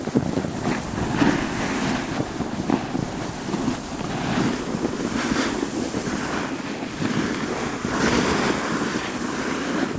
Snowboard Slide Loop Mono 02
Snowboard - Loop.
Other Snowboard loops:
Gear: Tascam DR-05.
field-recording, glide, gliding, ice, loop, slide, sliding, snow, snowboard, winter, winter-sport